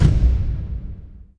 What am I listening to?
Large drum strike, suitable for film, film score, trailer and musical tracks.
Made by closing a car door in an empty underground parking, with some eq and dynamics processing.